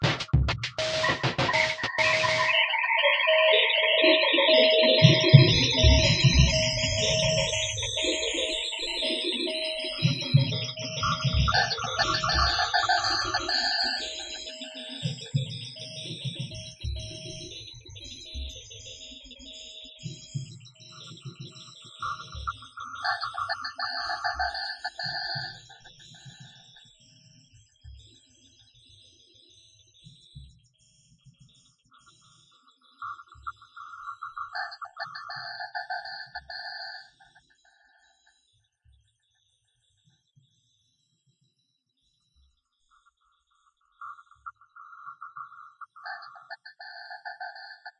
DISTOPIA LOOPZ 039 100 BPM
DISTOPIA LOOPZ PACK 02 is a loop pack. the tempo can be found in the name of the sample (60, 80 or 100) . Each sample was created using the microtonic VST drum synth with added effects: an amp simulator (included with Cubase 5) and Spectral Delay (from Native Instruments). Each loop has a long spectral delay tail and has some distortion. The length is exactly 20 measures at 4/4, so the loops can be split in a simple way, e.g. by dividing them in 20, 10 or 5 equal parts.